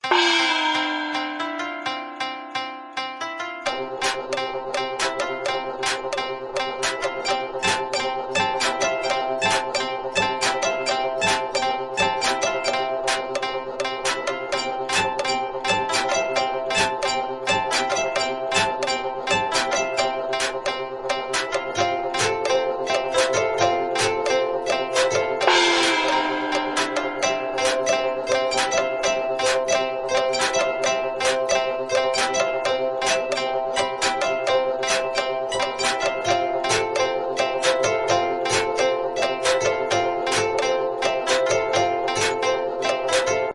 Town of Tranqness
Catchy rpg town song. You can use it for whatever without credit, commercial or non. 100% FREE. Tell me what it is if u want tho, I'm curious :)
RPG, background, cartoon, catchy, funny, game, silly, theme, town